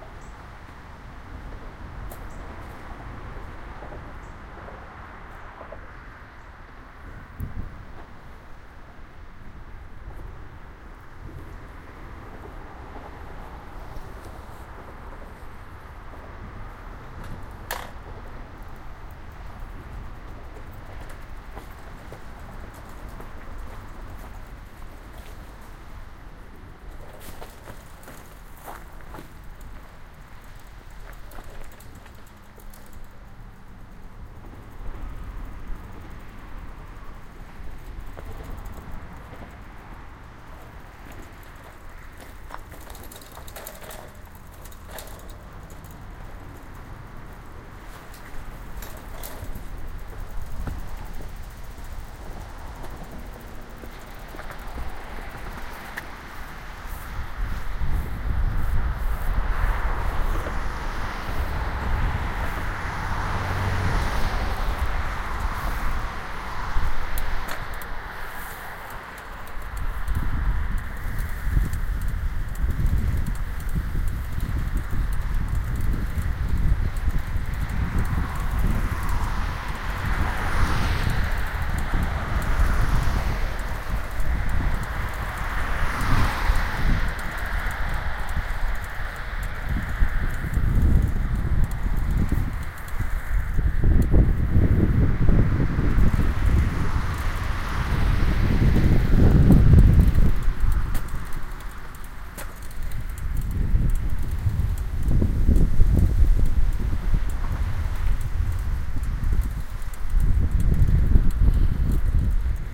bike ride
This is a shorter clip of a binaural recording I did under another bridge in south Austin (geotagged). The total distance ridden in the clip is about two hundred yards. It was pretty windy at around 10MPH and a hot day (98 or so). This is on a little dirt path along a frontage road of a highway.
recording chain: SP-TFB-2-->iriver h120 gain at 24dB